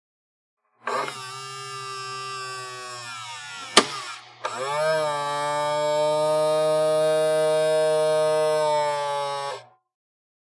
electric car SIDE MIRROR motor